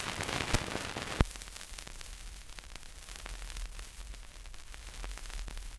vinyl - in 02
The couple seconds of crackle before the music starts on an old vinyl record.
Recorded through USB into Audacity from a Sony PSLX300USB USB Stereo Turntable.
noise, vinyl, vinyl-record, album, vintage, surface-noise, pop, crackle, turntable, noisy, record, lofi, LP